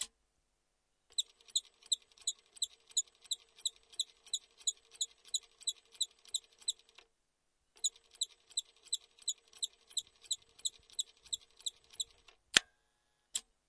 Queneau machine à coudre 13
son de machine à coudre